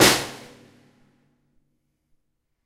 Individual percussive hits recorded live from my Tama Drum Kit